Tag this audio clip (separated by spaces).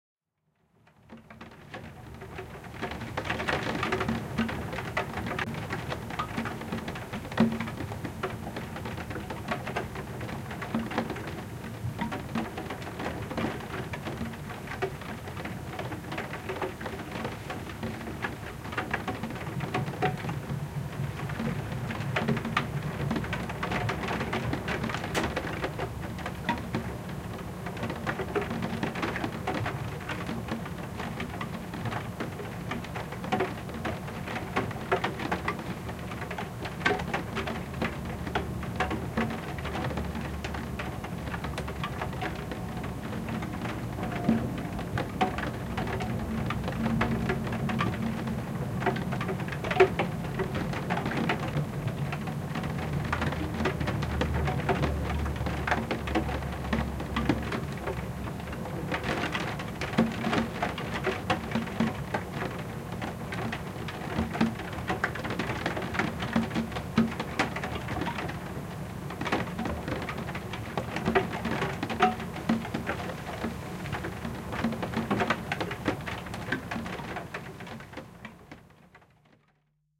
persistent rain rhythm skylight window